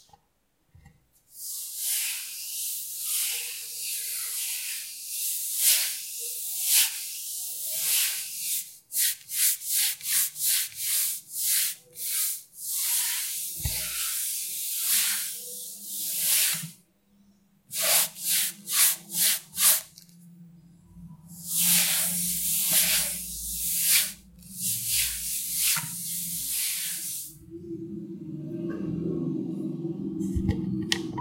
Sound of a cloth cleaning a table. Recorded with a Zoom H4N